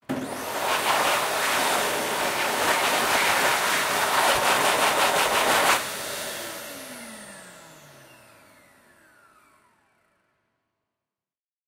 Hand Dryer 9 (hand movement)
Recording of a Hand-dryer. Recorded with a Zoom H5. Part of a pack
Bathroom, Hand, Dryer, Vacuum